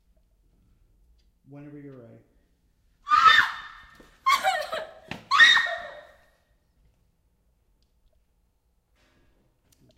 girl scream frank 2
scream, screaming, girl, screams, woman, horror, scary